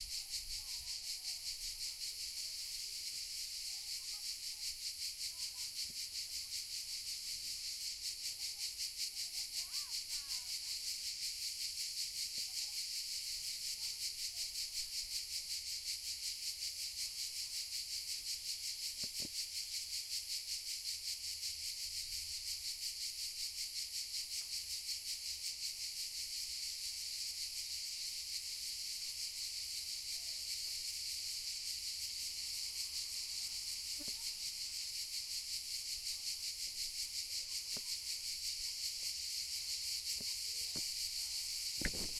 cicadas in a pine forest in south of Italy